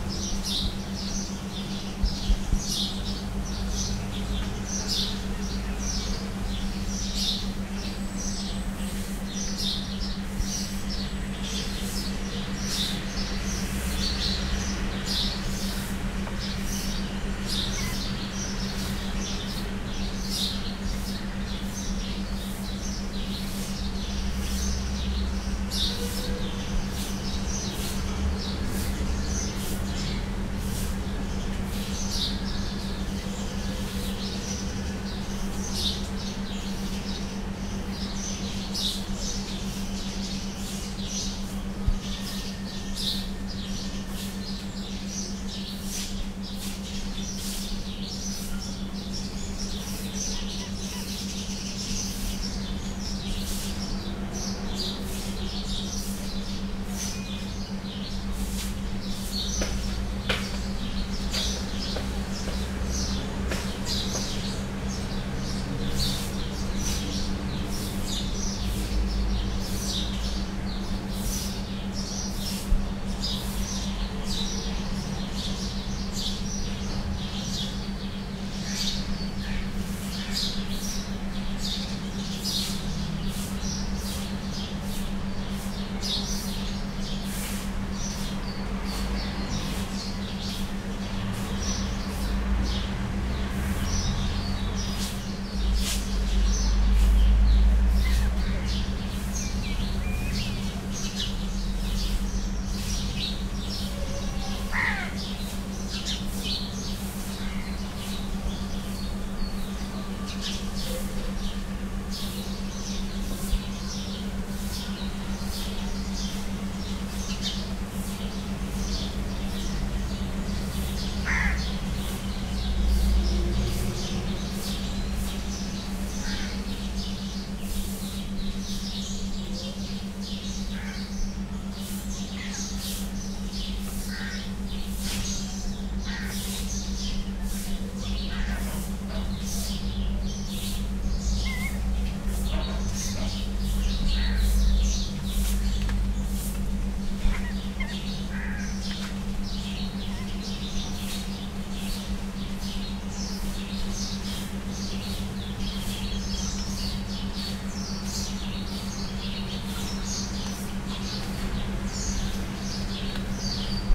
Tascam D60 with AT8022 stereo mic. Ambience bird sound with generator mysteriously humming in background; lake Kariba, if I remember correctly.
zambia, field-rcording, kariba, birds, engine, generator, africa, lake, ambience